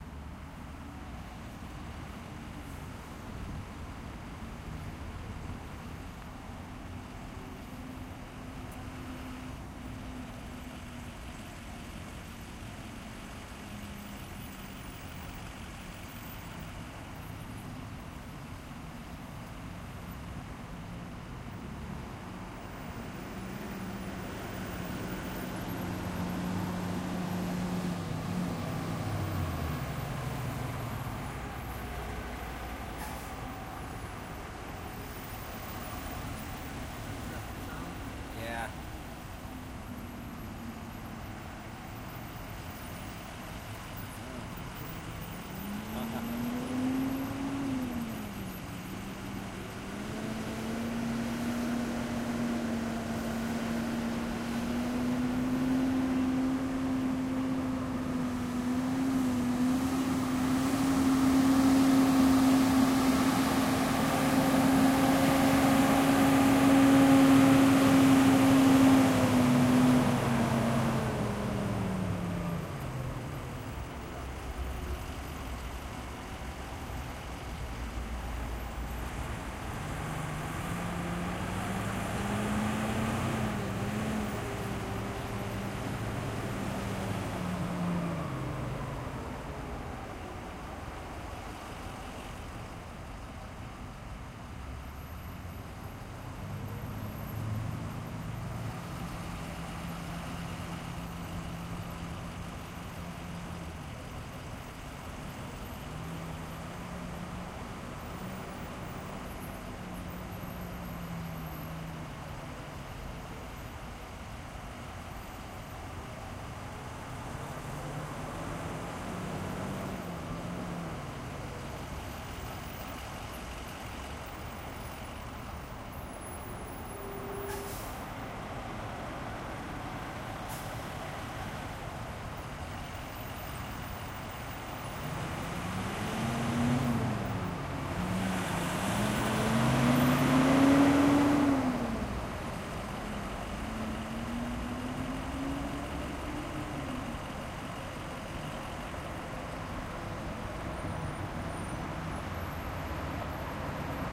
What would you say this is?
Recorded with a Tascam DR-05: Very slow moving traffic on the 405 South Freeway.